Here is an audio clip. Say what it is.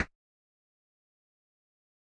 Menu Move 2
A simple sound effect used to indicate a selection in a video game menu.
game, video